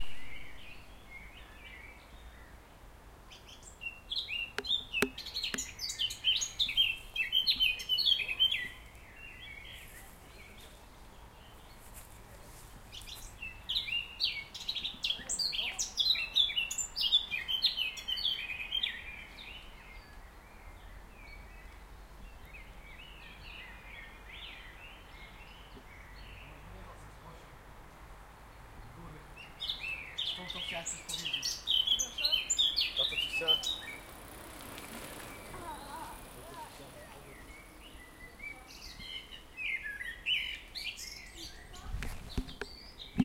This is common forest bird Sylvia atricapilla. It was recorded with Zoom H2N (XY), in the forest in Kielce in Poland